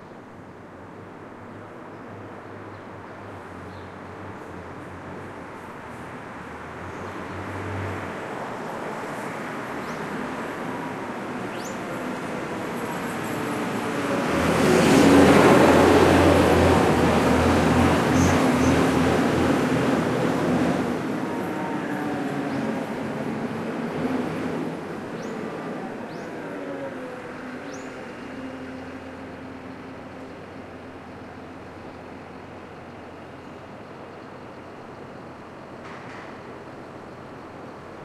120308 Manhattan59th02
Mid-range field recording taken on the corner of 59th St. and Columbus Ave. in Manhattan, New York.
The recording was made on a Saturday morning at about 6 AM and features flowing traffic with diverse cars, trucks and buses. Not many people are about at this hour, making for a nice, neutral backdrop for urban scenes.
A large dumpster truck passes the observer in the middle of the recording.
Recording conducted with a Zoom H2, mics set to 90° dispersion.
close-range, city, car, field-recording, truck, New-York, busy, street, traffic, ambience, ambient, noisy, morning, cars, noise, NY